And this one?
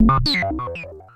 FX SH4
I recorded these sounds with my Korg Monotribe. I found it can produce some seriously awesome percussion sounds, most cool of them being kick drums.
fx, analog, sample-and-hold, percussion, monotribe